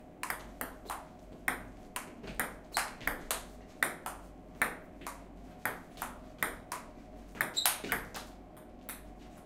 Office staff play ping-pong. Office Table Tennis Championships sounds.
sport, play, ping-pong, game, staff, office